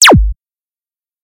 Flashcore kick 2
flashcore, flash, core, kick